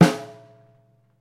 Part of "SemiloopDrumsamples" package, please dl the whole package.. With 'semilooped' I mean that only the ride and hihat are longer loops and the kick and snare is separate for better flexibility. I only made basic patterns tho as this package is mostly meant for creating custom playalong/click tracks.
No EQ's, I'll let the user do that.. again for flexibility
All samples are Stereo(48khz24bitFLAC), since the sound of the kick naturally leaks in the overheads and the overheads are a big part of the snare sound.
acoustic, drums